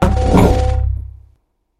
powerup shield
games, sounds, video, game